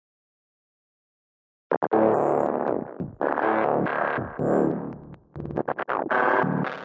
dirty dub 140
Mid run great for layering with other mids
dubstep, electronica, mids